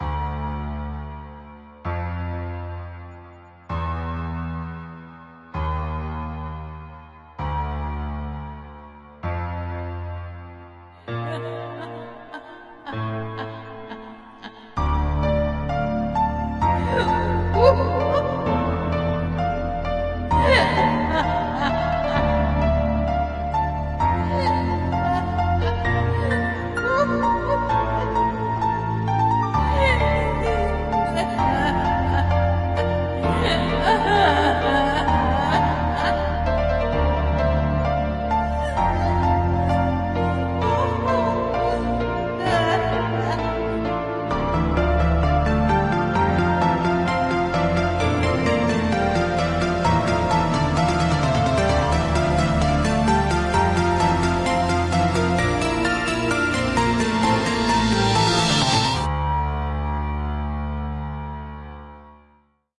Evil Witch Piano Intro
Intro, Melody, Scary